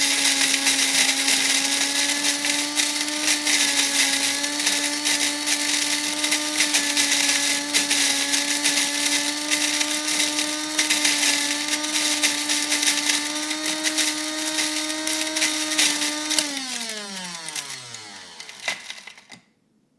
Coffee grinder at work, spinning down. Recorded with Sennheiser MKE 300 directional electret condenser mic on DV camcorder. Minimal processing, normalized to -3.0 dB.
grind, household, machine, noise, whir